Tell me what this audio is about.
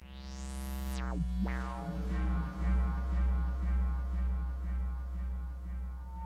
Abduction Single Bass
Created bass with Juno 60 (long sample)
bassline, juno